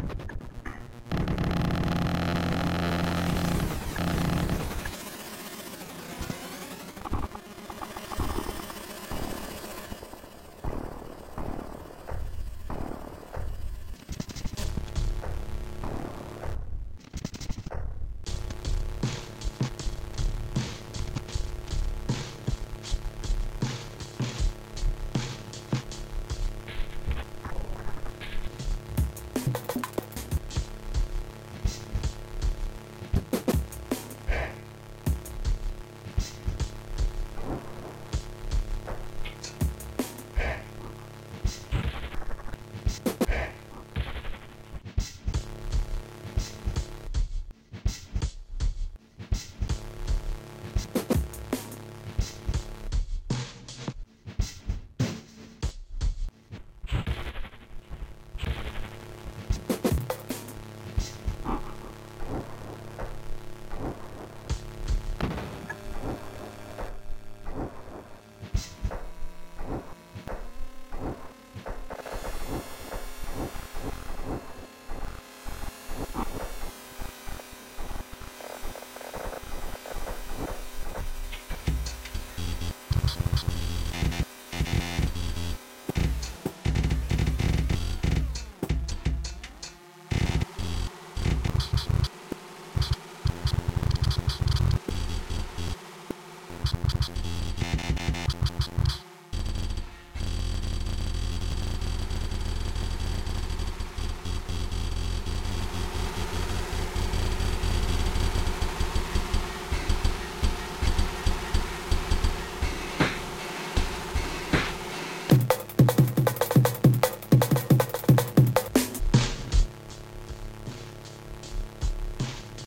Noise Max 3
Some lovely crunchy noise, made with Max